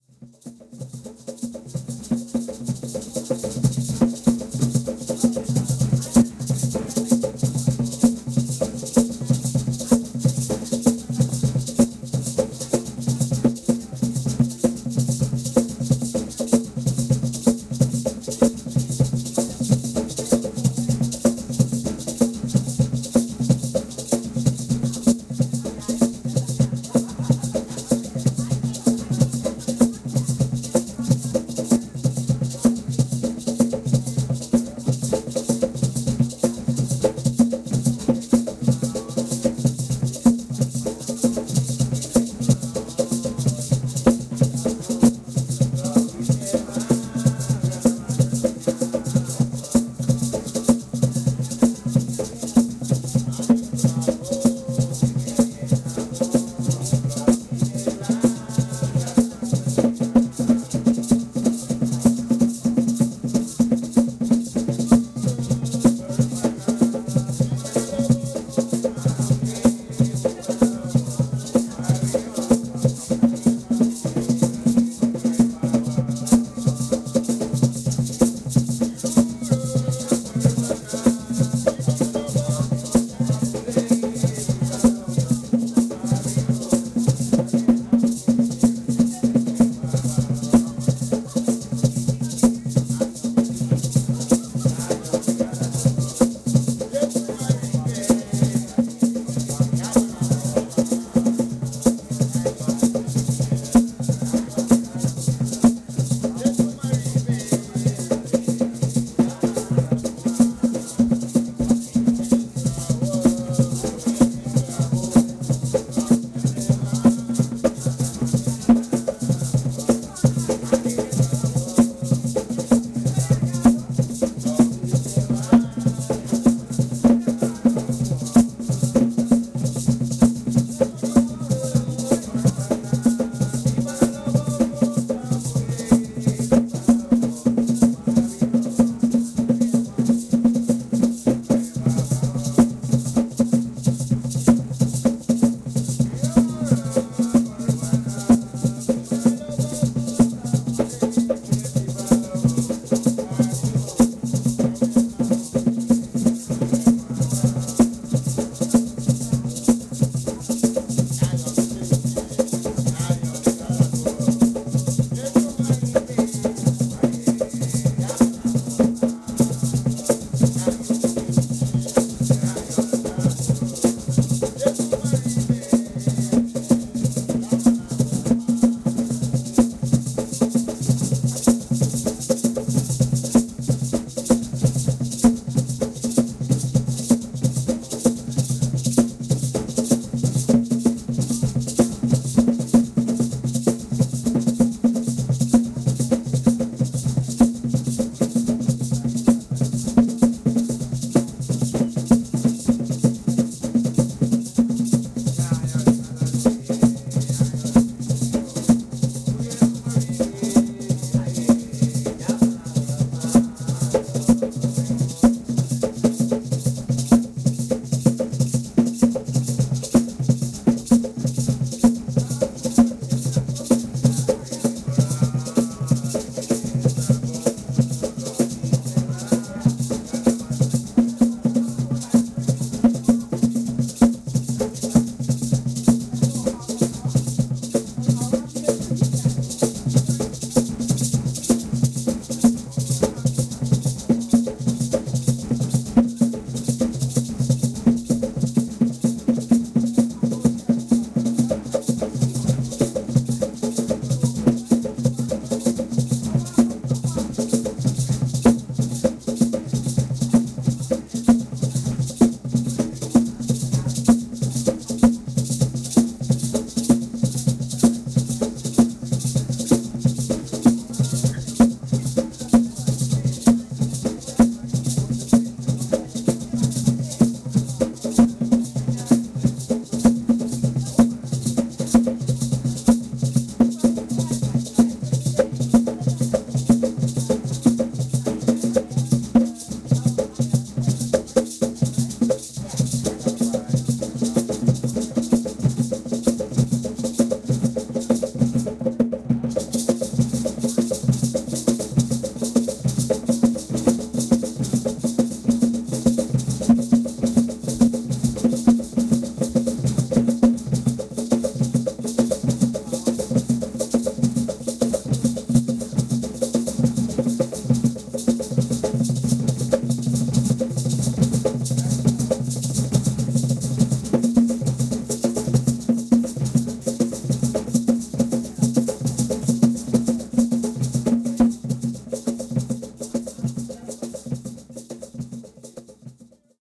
Garifuna Drumming 2, Placencia, Belize